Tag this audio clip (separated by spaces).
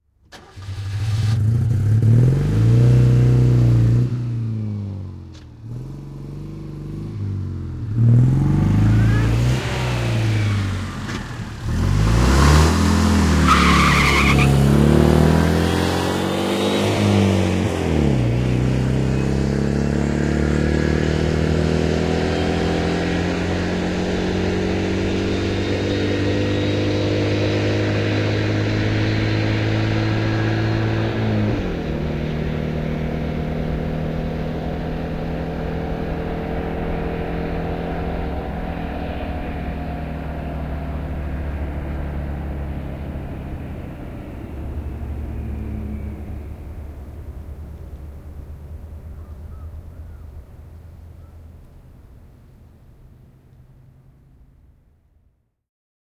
Autoilu Cars Field-Recording Finland Tehosteet Yle Yleisradio